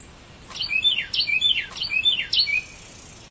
A Carolina Wren that wakes me up EVERY DAY at 6 am.
bird, chirping, singing